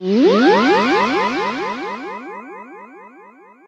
A spacey beaming sound
beam, space, game, jingle, effect